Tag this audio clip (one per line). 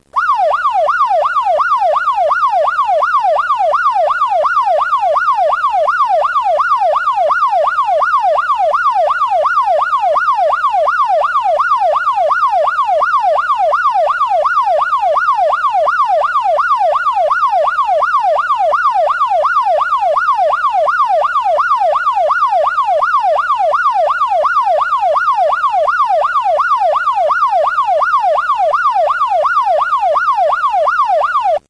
chase
city
city-noise
cops
highway
loop
police
police-siren
siren
street
traffic